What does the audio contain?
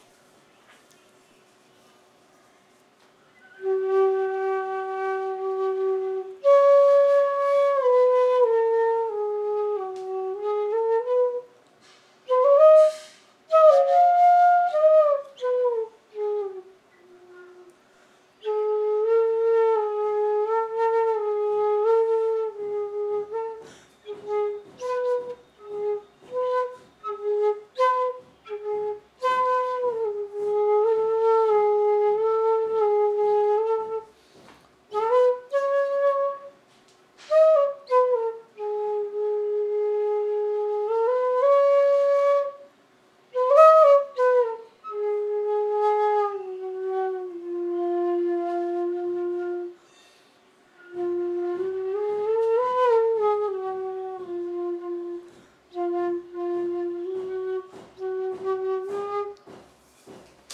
I recorded this Indian bamboo flute as a part of a sound check.
bamboo, flute, india